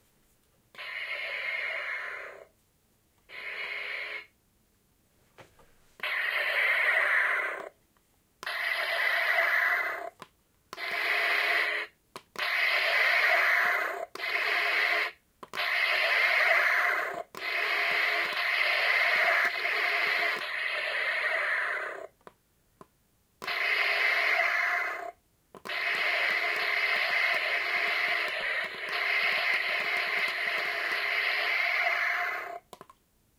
LIGHT SABRES 1
I was up in the loft and found tow old 'light sabres' that had been there for years. To my surprise they still worked, so heere thy are, as recorded. There are a few clicks on here that are the sound of the buttons being pushed.